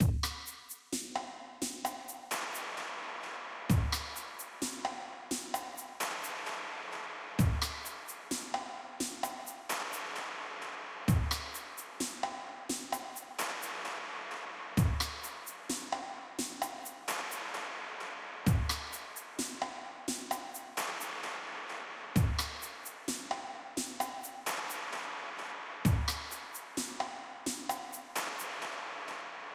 Ambient Drum+Perc loop 130bpm
An Ambient style Drum loop created by myself for a Chillout track. Has a slightly overdriven kick drum and a lot of reverb on the percussion.
Ambient, Percussion, Drum, loop, Chill, Dubstep, Chillout